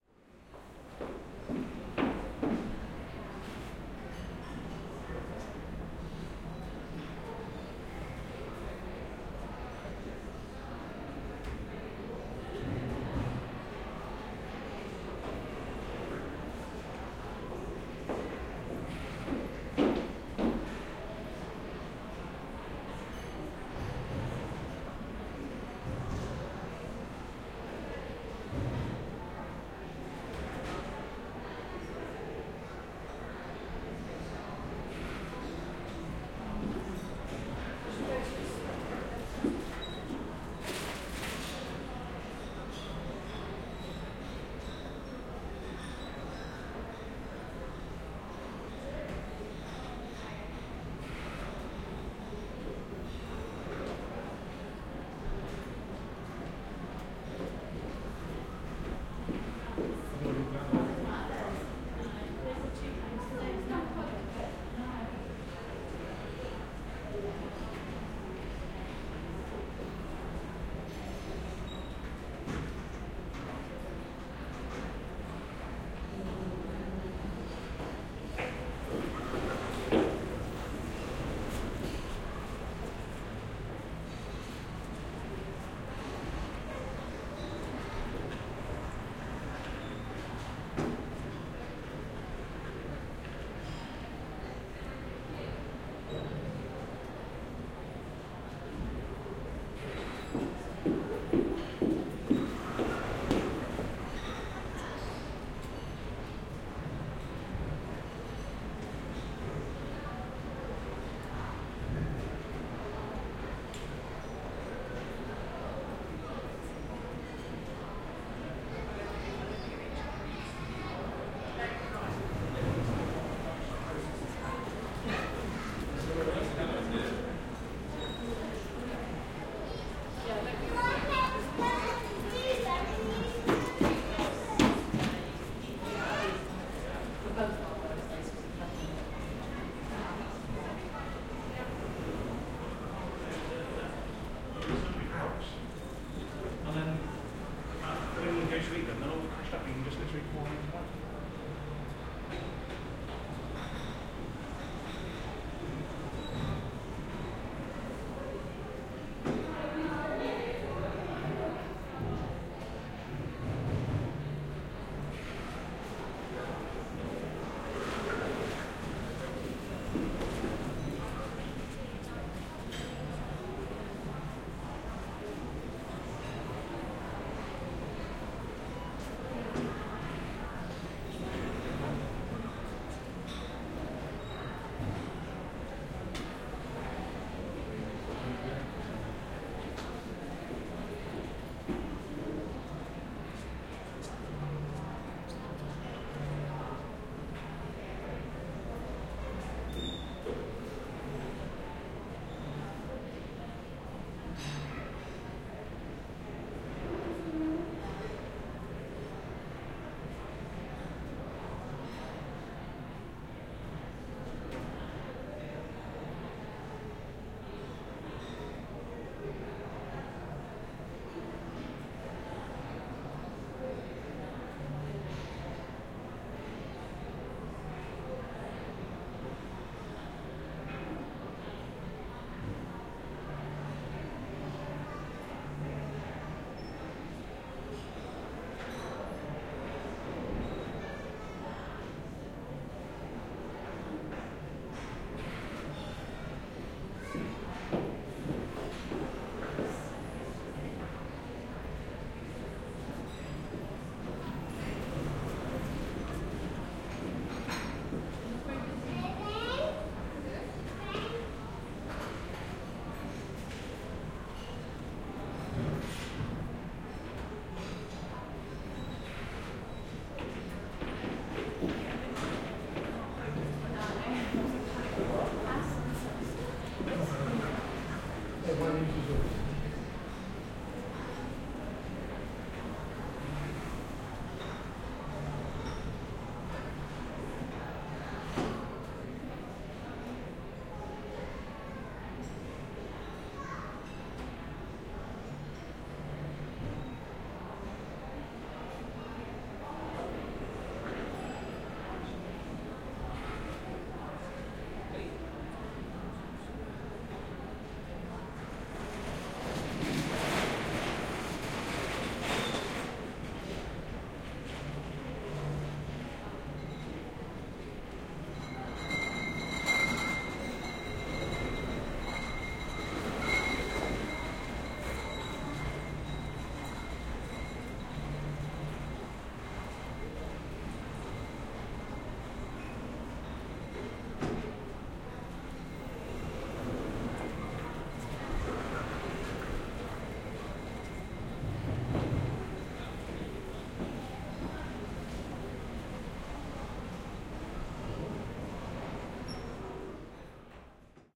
Entrance lobby, M&S Whiteley
In between sliding doors, one set to the store, another to the street. People walking past talking and people chatting and eating in the cafe upstairs.
Tascam DR-22WL, 40Hz HP, Roland CS-EM10